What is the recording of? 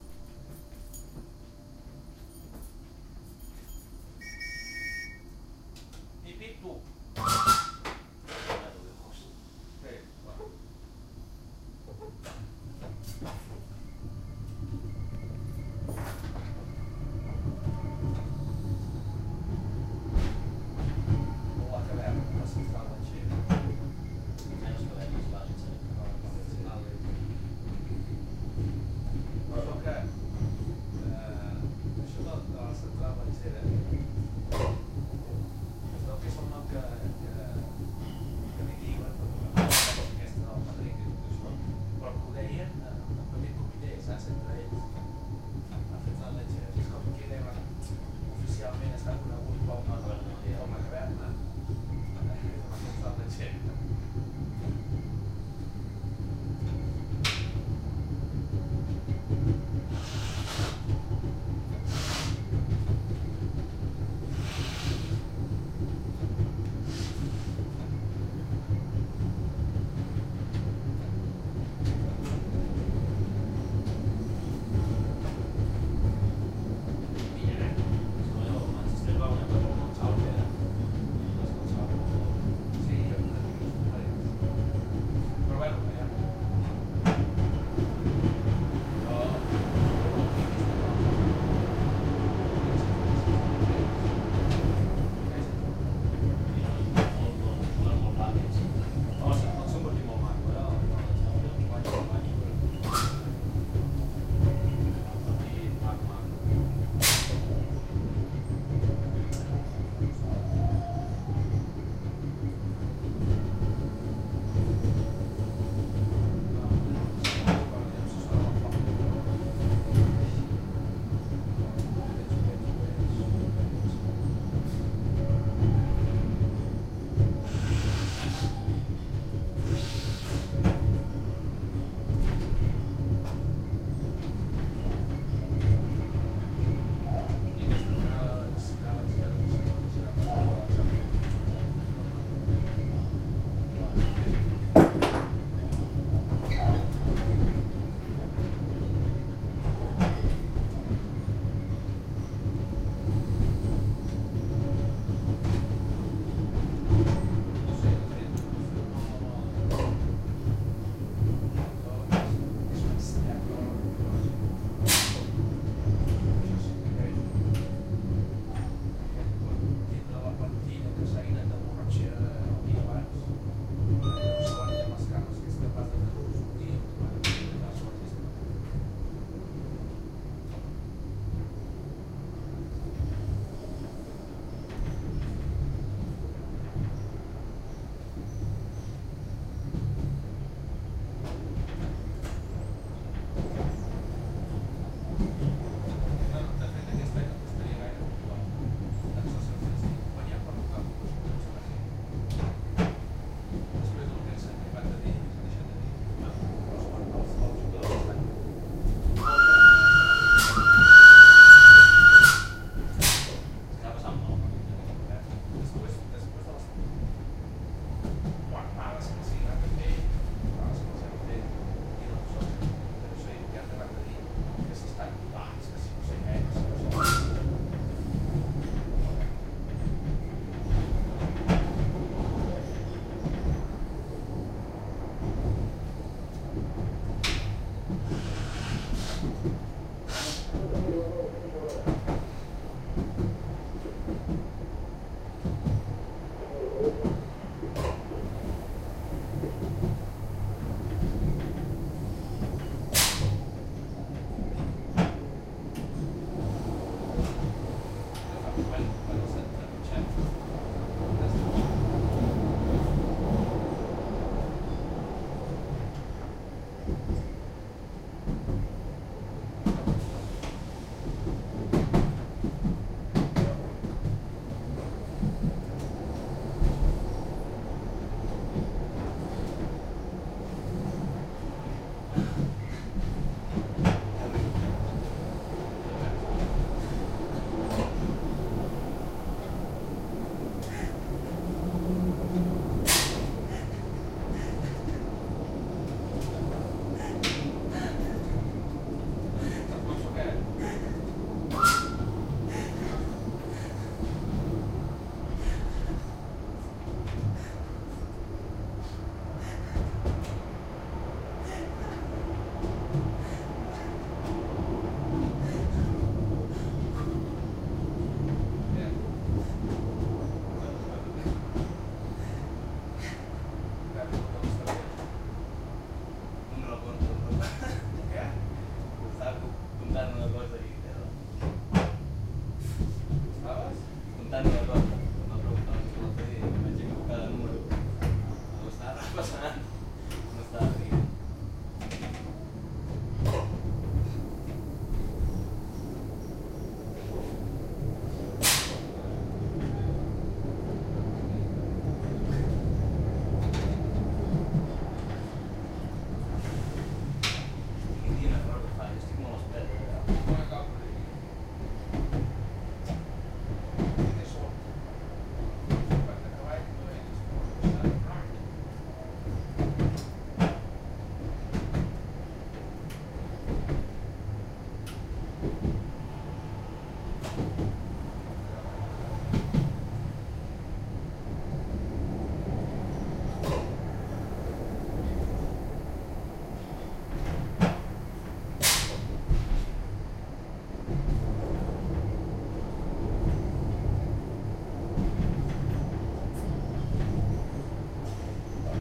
13 cremallera int
inside the 'cremallera' of núria, a little train climbing the Pyrenees mountains
ambiance, atmo, atmos, atmosphere, background, background-noise, boarding, bounce, clang, clatter, cremallera, electric, electrical, electric-train, express, field-recording, iron, junction, knock, local, locomotive, metal, noise, noises, passenger-train, pond, rail, rail-road, rail-way, railway